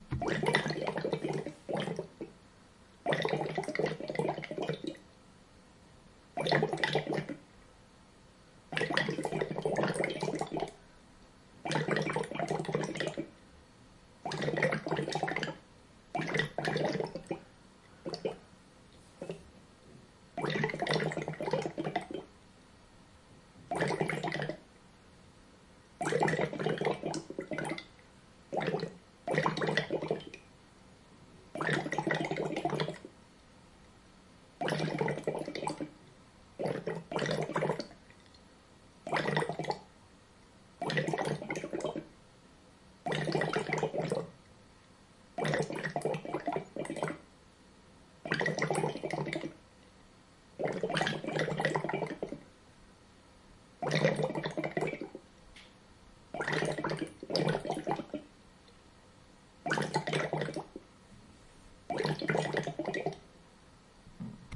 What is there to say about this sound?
Airlock homebrew mash
The sound of two airlocks letting out Co2 from a mash of homebrew.
airlock, yeast, fermentation, sugar, beer, fermenting, moonshine, homebrew